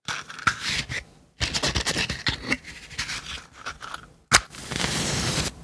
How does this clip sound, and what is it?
Lighting a match. Cleaned with floorfish.